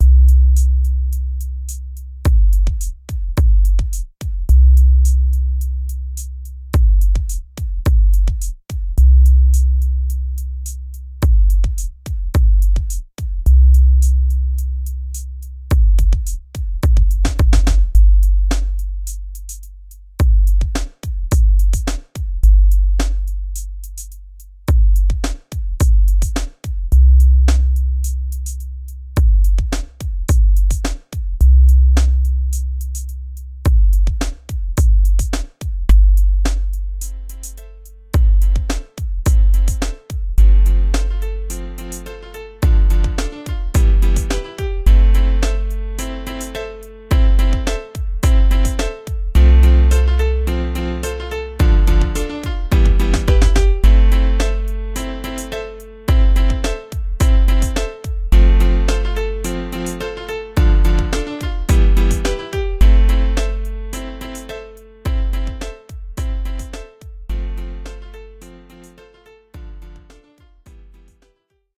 On Road mini beat 1
thump, drum, rap, thumping, hip-hop, piano